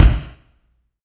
KICK HELPER 2
kick, kicks, drum, drums, drum kit, drum-kit, drumkit, percussion, tama, dw, ludwig, yamaha, sample, blastbeat, beat, blast-beat, hard, bass
bass, beat, blast-beat, blastbeat, drum, drum-kit, drumkit, drums, dw, hard, kick, kicks, kit, ludwig, percussion, sample, tama, yamaha